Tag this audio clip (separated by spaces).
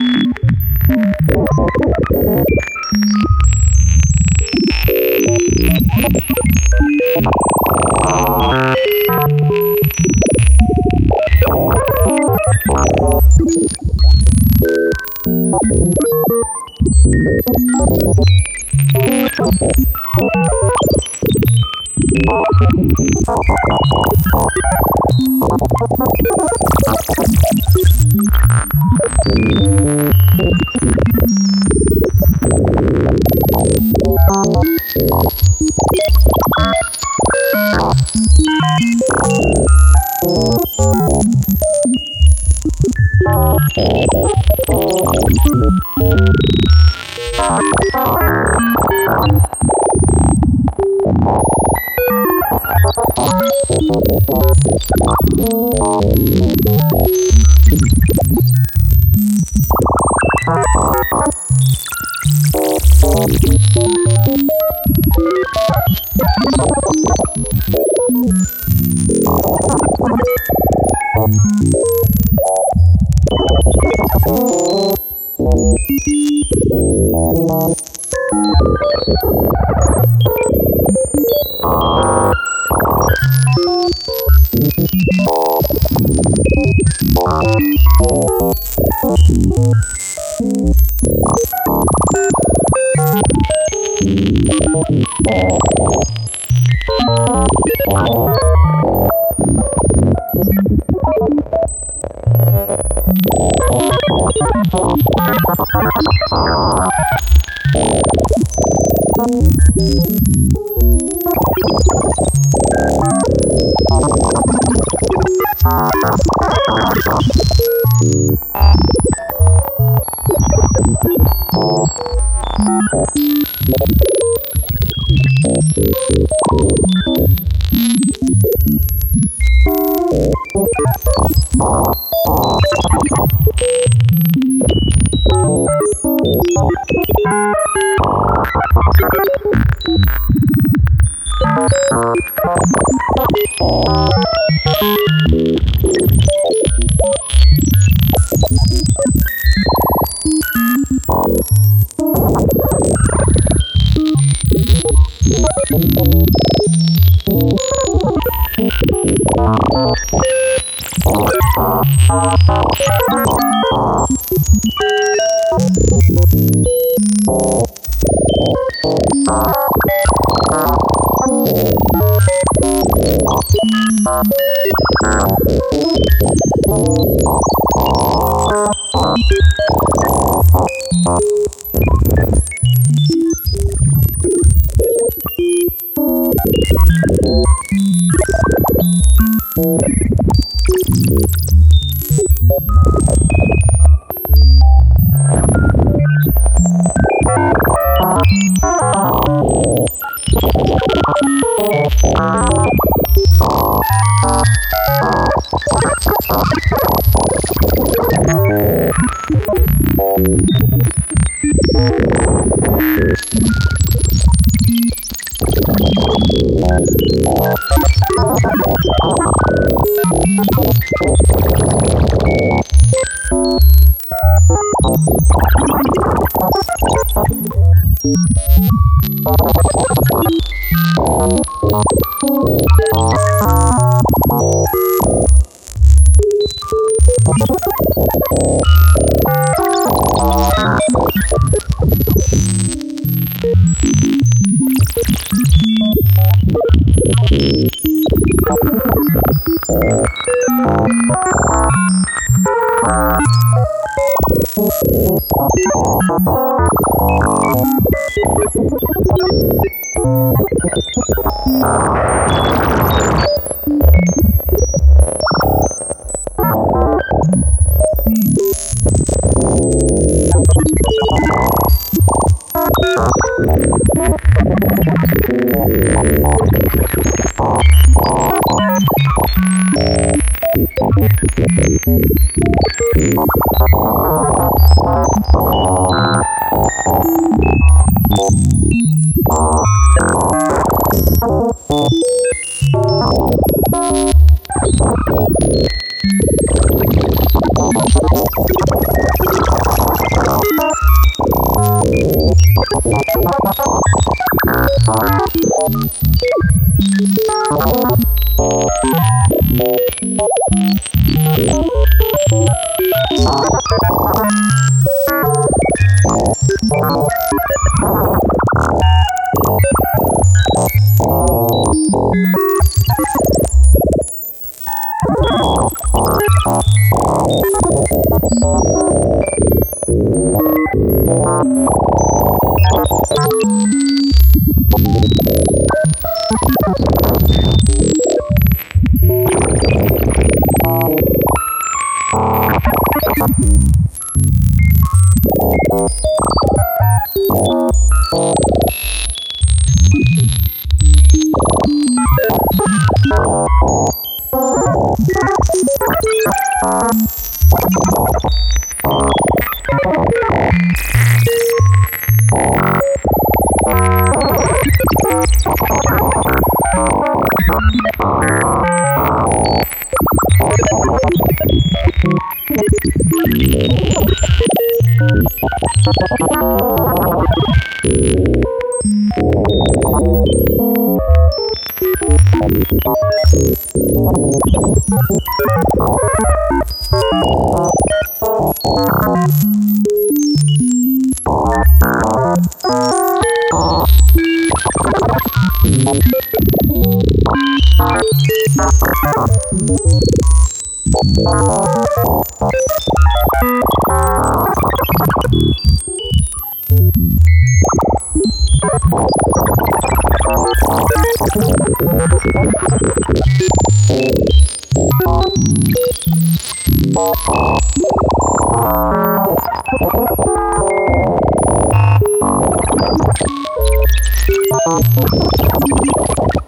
algorithmic-music chaotic computer-generated deterministic pure-data synthesis